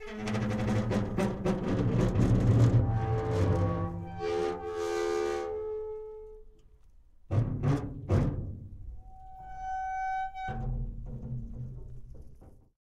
metal gate 07
Large metal gate squeaks rattles and bangs.
squeaks, rattles, bangs, metal, gate, large